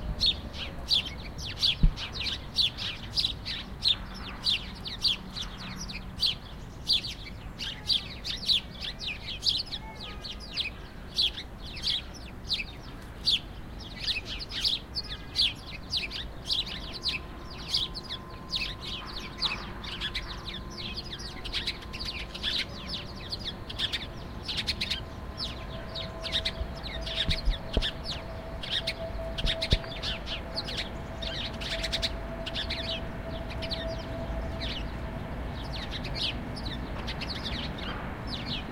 Birds in a park
birdsong, forest, ambience, park, spring, ambient, birds, nature, outside, field-recording, bird